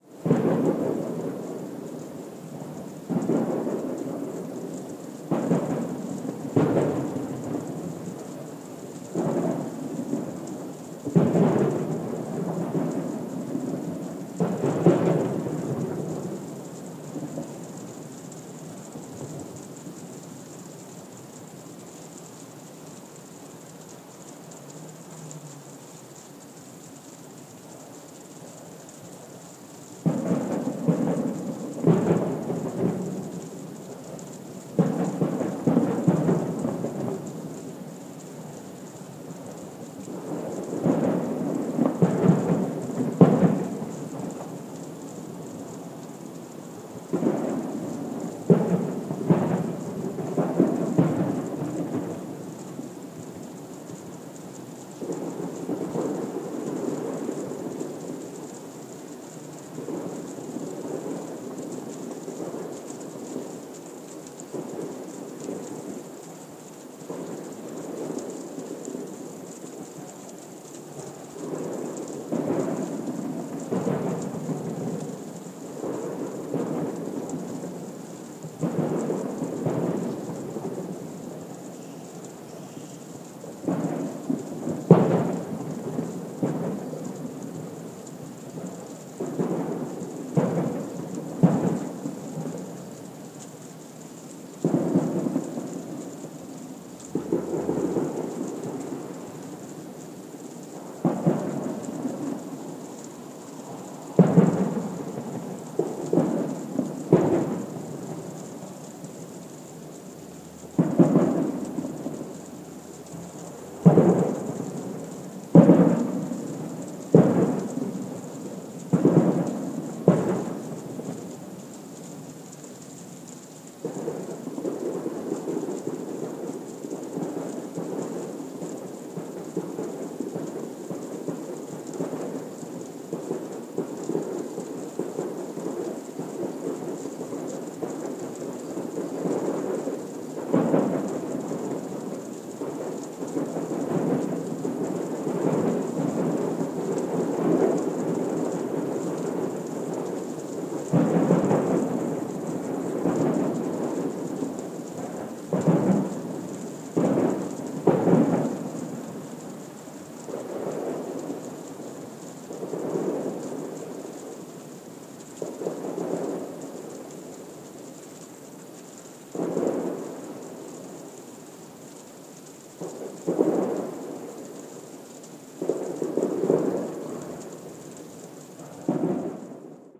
20170503203232 Fireworks Neutral Bay Sydney New South Wales Australia
A field recording of the sound of rain falling softly and fireworks booms, Neutral Bay, Sydney, New South Wales, Australia, 3/5/2017, 20:32.